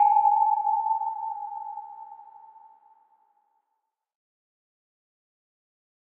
Sonar A DRY
Processed recording of a railing in the key of A.